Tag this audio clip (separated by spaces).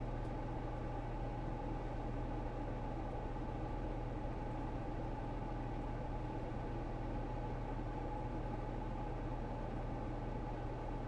ac air fan filter